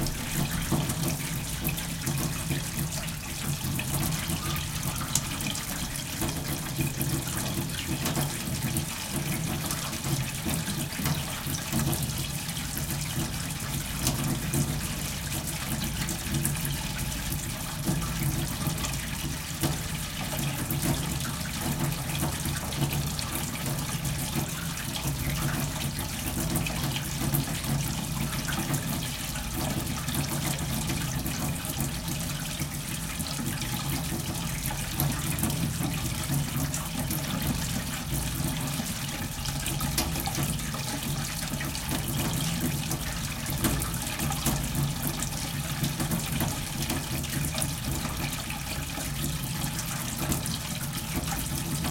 water run from tap faucet into large metal sink2 close
from, metal, faucet, sink, large, into, water, tap, run